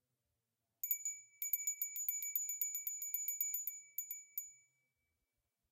Es la típica campanilla que podemos utilizar para llamar a comer.
Typical bell that can be used for many occasions.
Campana, Ring, Small, bell, Campanilla